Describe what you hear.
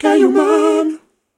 CAILLOUX MAN
voice, PROD, male, low, gweebit